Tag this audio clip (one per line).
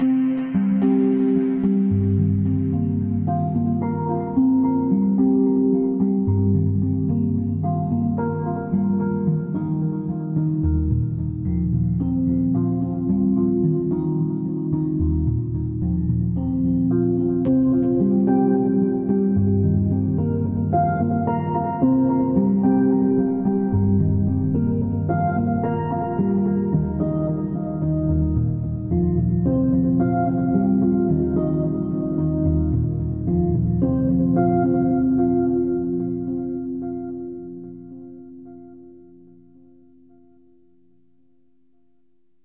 110
Ambient
Atmospheric
BPM
Delay
Emotional
IDM
Loop
Minor
Organ
Pad
Pads
Reverb
Sad